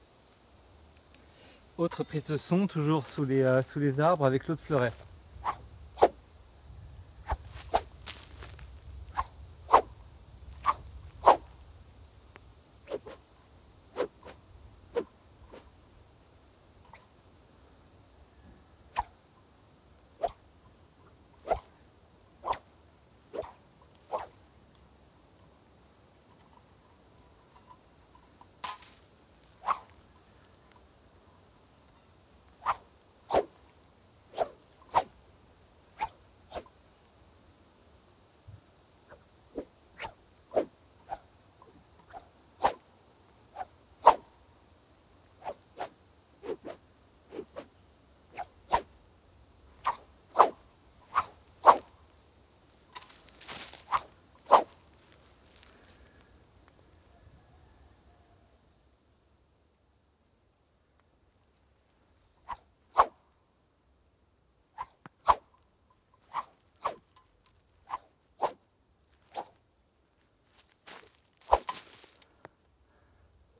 Woosh Fleuret Escrime B
Raw serie of wooshes made in mono, with two different foil, recorder with a Mixpre10T and a MKH8060